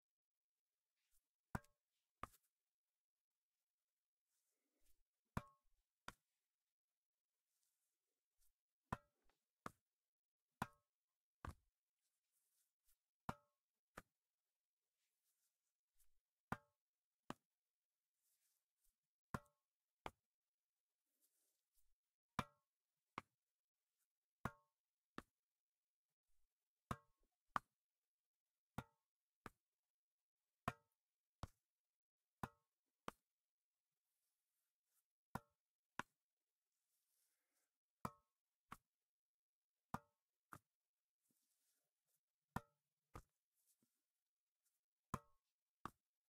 tennis ball
tennis racket hitting the ball and a hand catching it. multiple times
close, racket, sport, tennis-ball, tennisracket